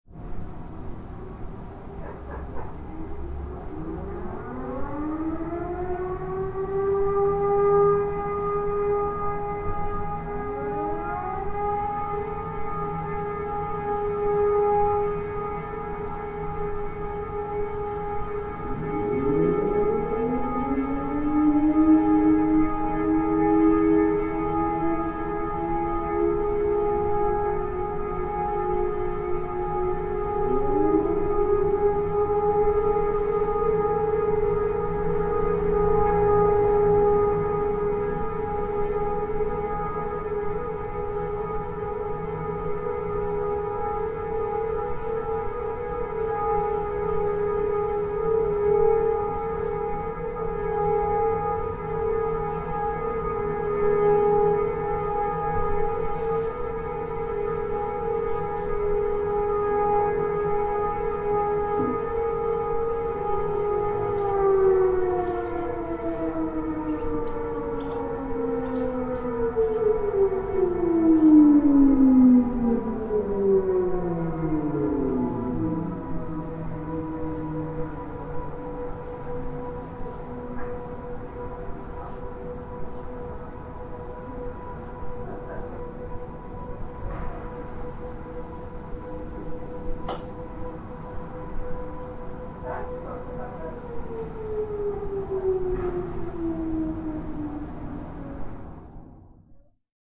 air raid sirens
European air-raid sirens sounding for a minute in the rememberence of the victims in a war.
protection, emergency, alarm, sirens, field-recording, alert, danger, civil-defense, air-raid, tornado